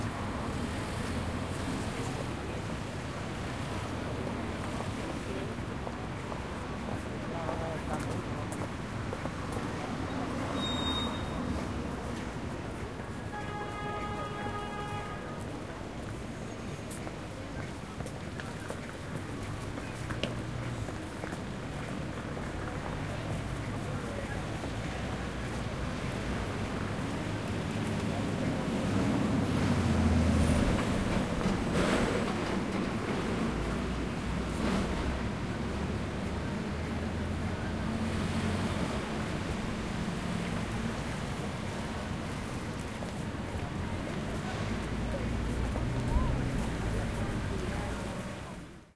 Street City Traffic Busy London Close Perpective
Busy, City, Close, London, Perspective, Street, Traffic